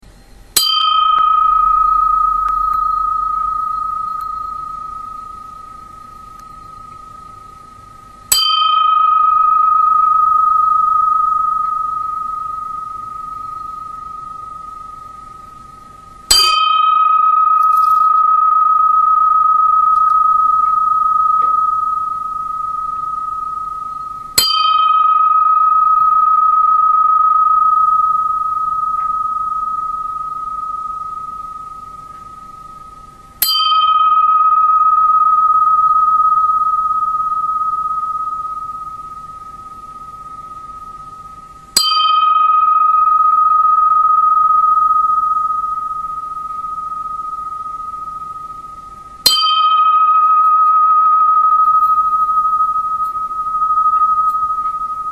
cukraus dangtelis32
metal lid spinning on various surfaces, hit by other objects
spin, lid, metal